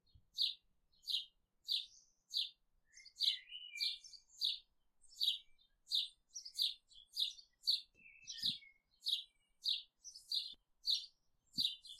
Fieldrecording of sparrow(s) with the Zoom H5. Post-processed with Audacity.
field-recording, chirp, birds, sparrows, bird, chirping, nature, tweet, birdsong, sparrow